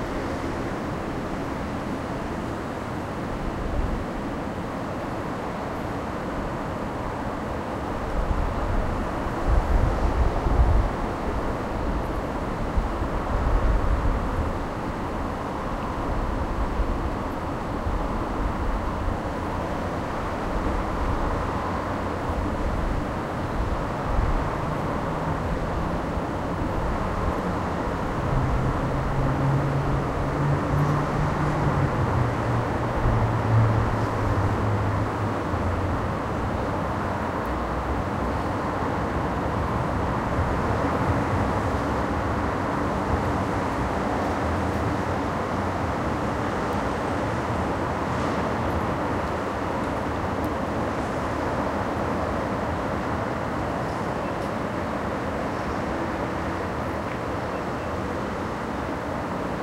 trafego leve
light traffic with some wind.
field-recording, sound-effect, ambience, city, traffic